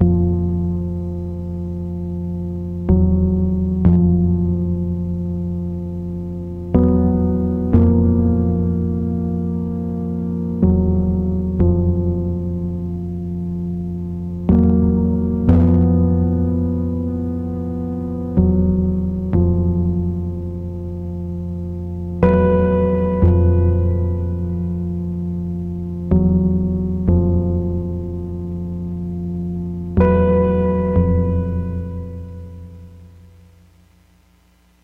Circuit 7 - Keys 1
Synth Keys Loop
124 BPM
Key of F Minor
dance, portland, sample, evolving, oregon, downtempo, electronica, lofi, hardware, beat, synthesizer, noise, electronic, bass, industrial, digital, synth, ambient, analog, psychedelic, loop, music, percussion